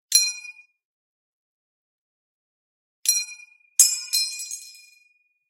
GUNMech M1 GARAND CLIP EJECT MP
Field recording of an M1 Garand magazine ejection. This sound was recorded at On Target in Kalamazoo, MI.
clip, firearm, magazine, ping, 30caliber, 30, m1, gun, m1garand, grand, rifle, caliber, garand